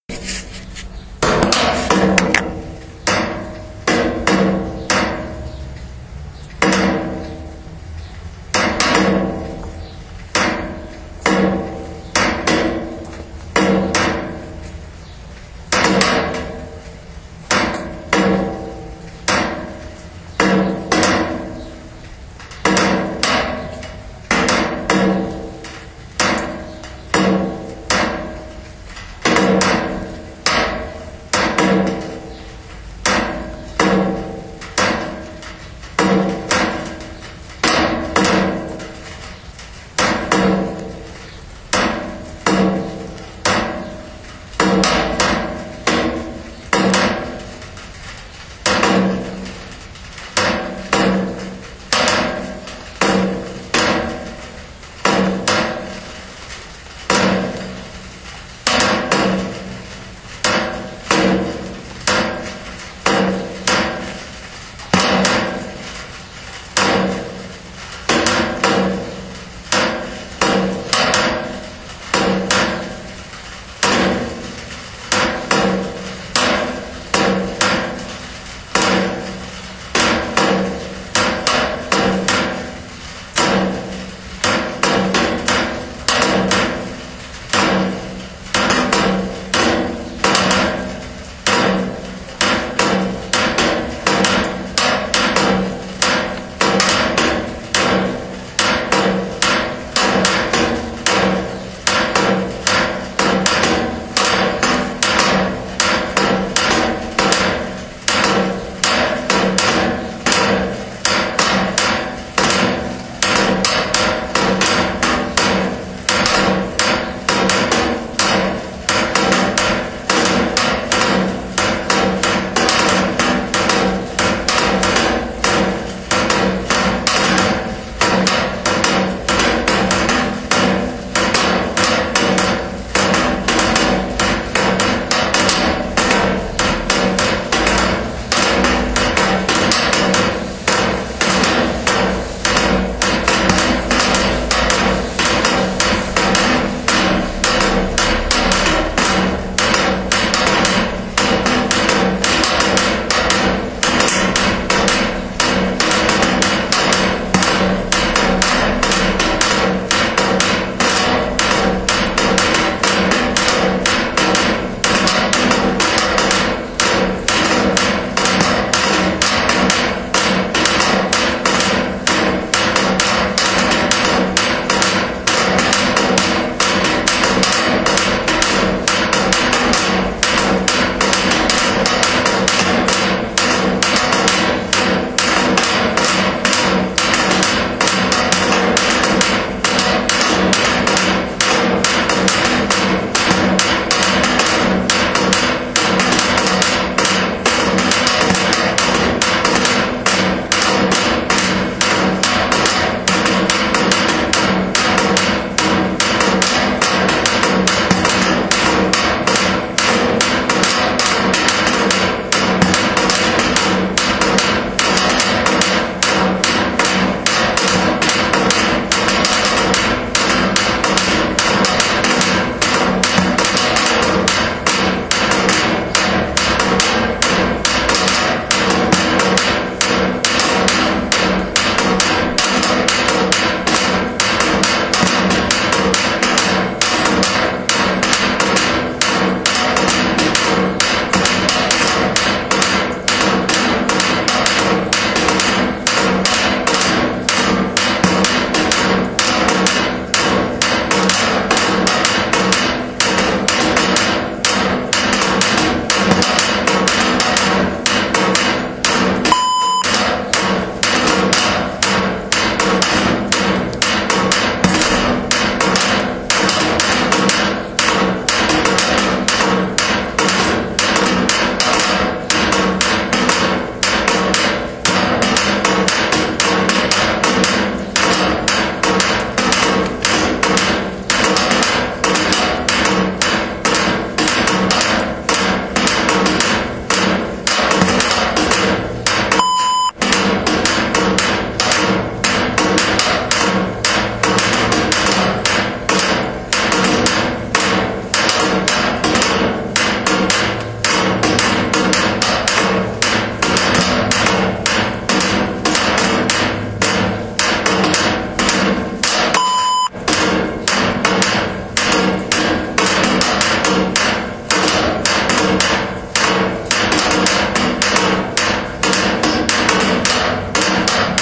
rain drops
Recorded on mid-summer (that looked like mid-autumn) day 2010 in Vilnius with a simple Olympus voice recorder. I placed an old piece of metal sheet on a broken glass fish tank near a garage wall to catch the drops, recorder was placed in the middle of the sheet. The rain was very light and fine, later it intensified and became lighter again - all this is heard in the recording. Unfortunately three recorder beeps are heard at the end signaling that it was running out of memory. I later decided to leave them in as it quite unexpectedly added some drama to the whole record...
drops, march, rain, sheet, tin, water